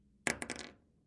Plastic Thud 4
Recorded on a Tascam DR-100 using a Rode NTG2 shotgun mic.
Plastic thud/bounce that can be used for dropping small objects onto a table or other plastic objects onto a hard surface.
high, pitch, small, plastic, hit, object, thud, bounce